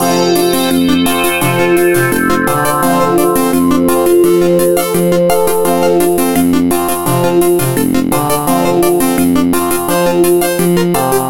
Loop 1- awhile away
A loop i created from another creation of mine.
Here is the original creation by JCG Musics USA
alternative, loop, music